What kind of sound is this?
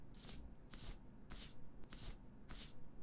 Steps on sand
domain, foley, public, studio-recording